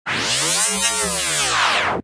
Foley samples I recorded and then resampled in Camel Audio's Alchemy using additive and granular synthesis + further processing in Ableton Live & some external plugins.